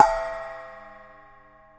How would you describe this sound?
This cymbal was recorded in an old session I found from my time at University. I believe the microphone was a AKG 414. Recorded in a studio environment.
perc, splash, percussion, cymbal